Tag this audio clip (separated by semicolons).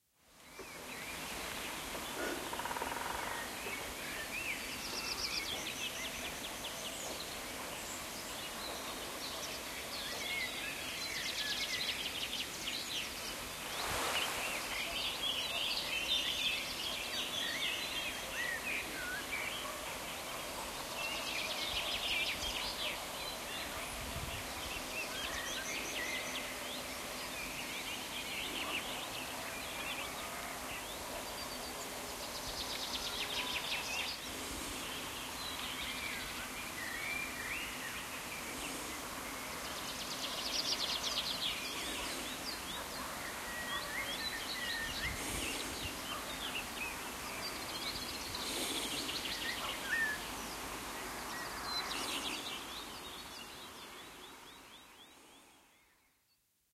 BIRDS; FIELDS; VILLAGE